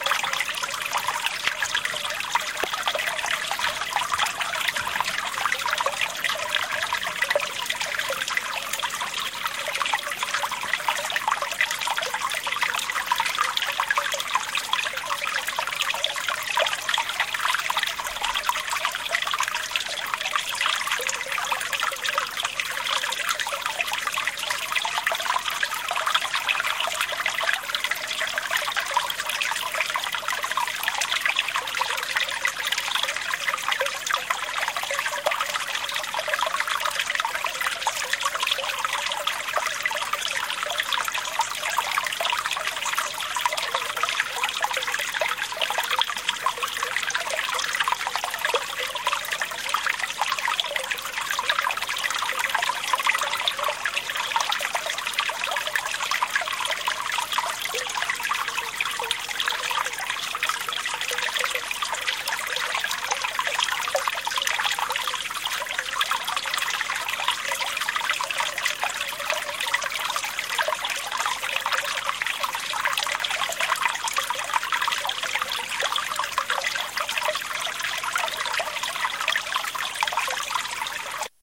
babble brook
A lovely walk through the woods behind Kennack Beach in Cornwall, following the stream, down to the beach, i stopped, in amongst the trees, to record it...
recorded on my Nikon D32oo camera using external Sony stereo mini microphone..